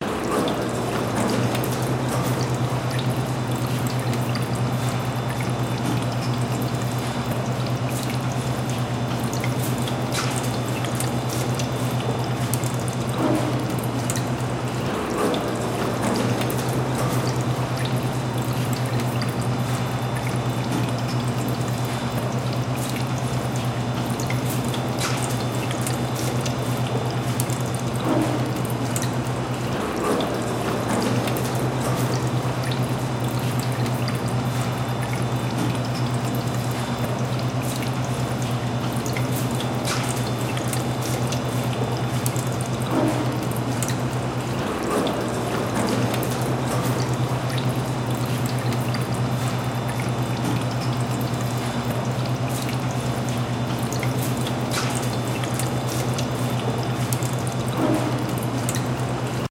Water Fountain
snow, cold